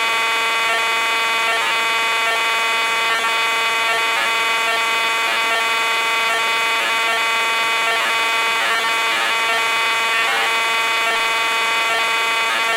A noisy and harsh sample of HF radio data transmission.